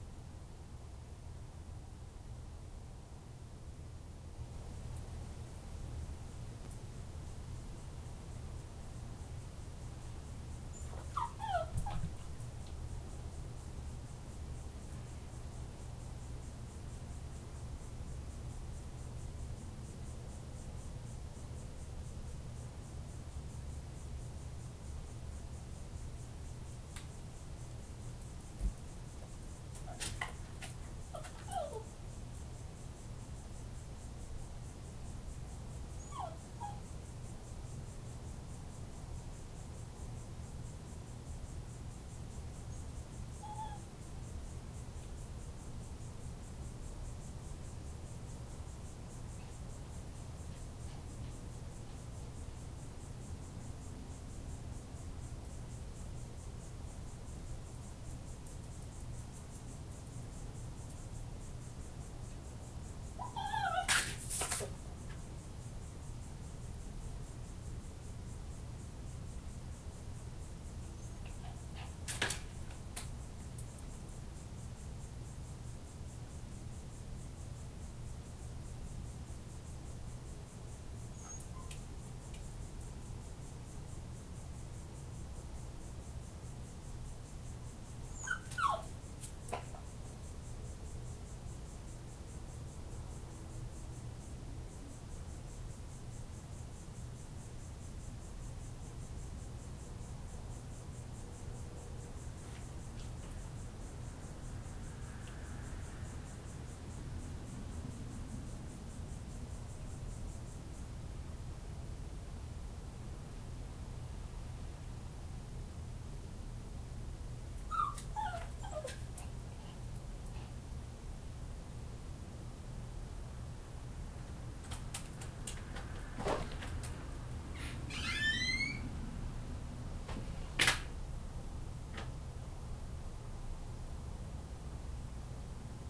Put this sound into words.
Dog finally gets in from outside on the patio with the laptop and USB microphone.